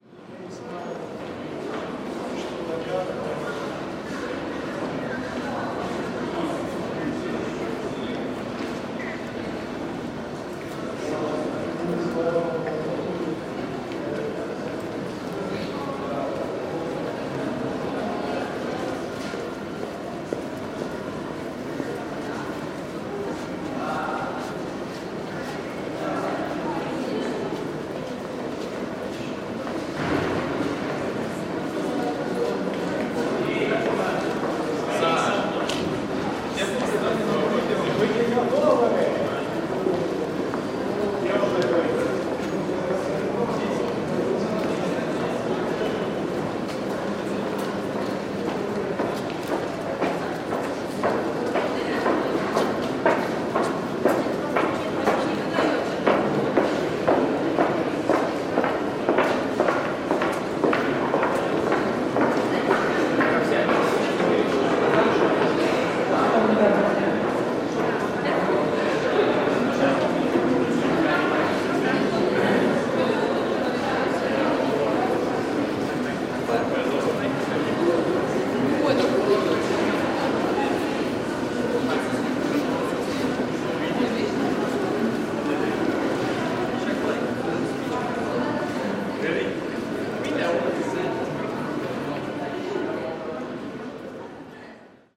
Walla university
Lomonosov Moscow State University